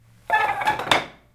i push chair away from me